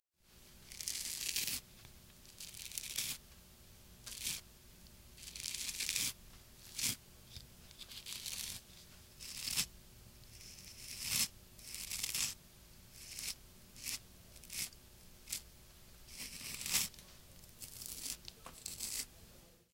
I wanted a shaving beard effect, didn't work well with a razor
so I scraped a butter knife over my beard and hey presto!